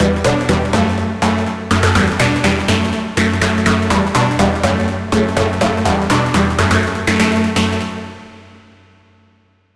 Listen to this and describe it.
sydance4 123bpm
background, beat, broadcast, chord, club, dance, dancing, disco, dj, drop, instrumental, interlude, intro, jingle, loop, mix, move, music, part, pattern, pbm, podcast, radio, sample, sound, stabs, stereo, techno, trailer, trance